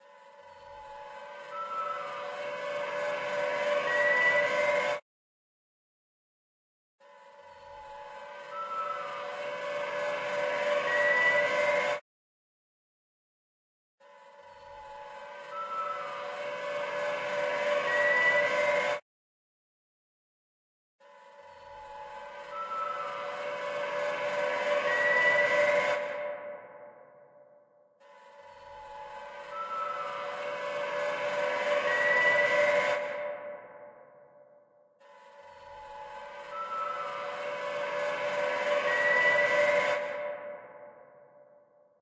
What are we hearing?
TIE fighter flyby
Imitation of the sound of the TIE fighters from Star Wars. I created this sound for my movie "Forevers 2: Age of Teeth".
This sound contains six variations: Straight flyby, flyby left to center, and flyby right to center, all with and without reverb.
fighter, science-fiction, flight, plane, fly, sci-fi, star-wars, spaceship